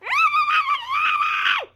muffled angry screaming
losing a game, face in my hands and screaming in frustrated anger.
angry, muffled, scream